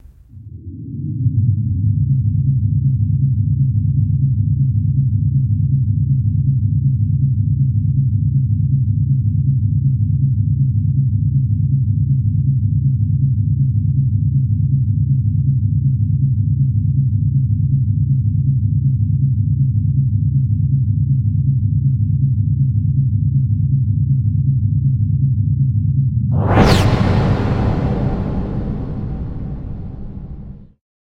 Planetary Rumble
abstract sound of space, consistent sound low rumbling
effect, rumble, soundscape, fx